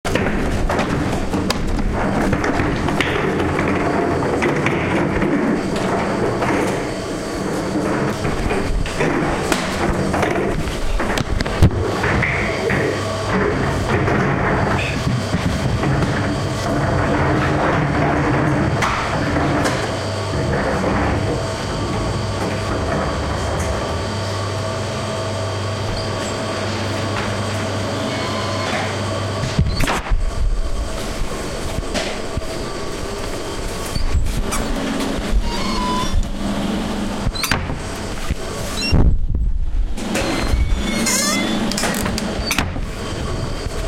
industrial sound design